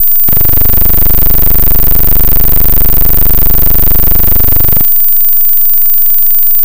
Down and up glitch
Glitch sound that goes down and then up. Made in Audacity